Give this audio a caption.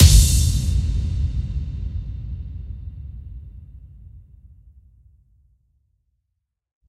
Kick-bomb sound made in FL Studio. 4 Different kicks layered with a crash and a lot of reverb. Carefully EQ'd and compressed for maximum impact.